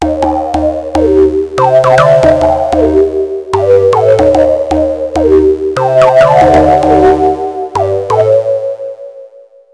Nord Low4
Nord Lead 2 as requested. Basslines are Dirty and Clean and So are the Low Tone rhythms.
glitch, idm, melody, synthesizer, ambient, nord, soundscape, bassline, rythm